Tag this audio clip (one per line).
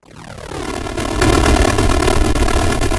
8-bit
arcade
battle
bomb
boom
explode
explosion
explosive
fire-crackers
firecrackers
fire-works
fireworks
fourth-of-july
game
missle
rocket
rockets
sfxr
war